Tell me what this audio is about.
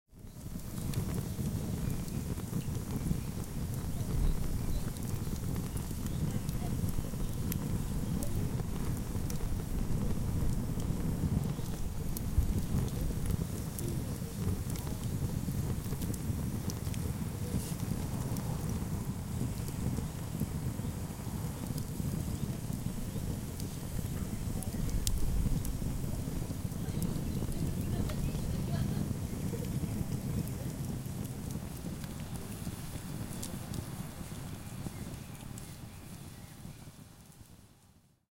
A close recording of a small fire at a picnic.
ambient, burning, field-recording, fire, picnic